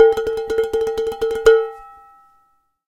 Stomping & playing on various pots
pot, rhytm